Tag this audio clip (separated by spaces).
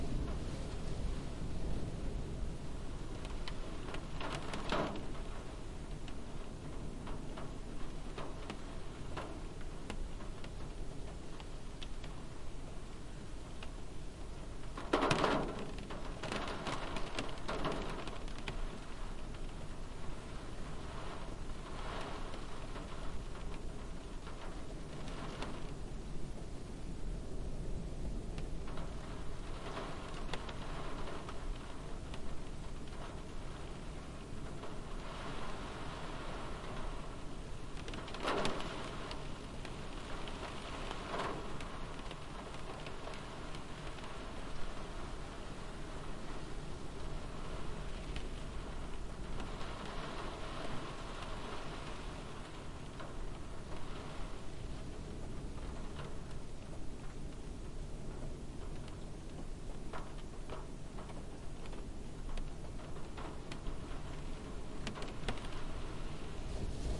rain h4n atmosphere windscreen zoom automobile ambience stereo window car field-recording ambient vehicle pitter-patter